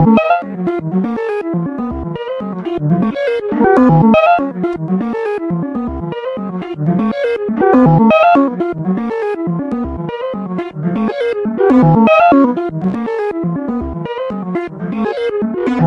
I was testing some VSTis and VSTs and recorded these samples. Some Ausition magic added.
VST for pitch bending, time stretching etc. used improperly on a VSTi flute track.
Loopable for 120 bpm if you know what to do (I do not).
120-bpm, atonal, bad, loop, noise, terrible, unacceptable, weird